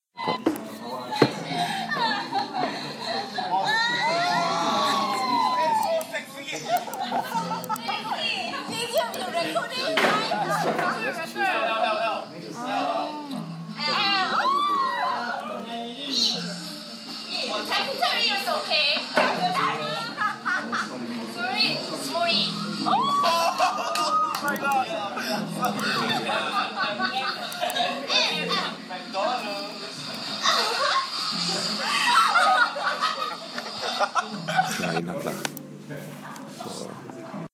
Guys and Girls playing Drinking Game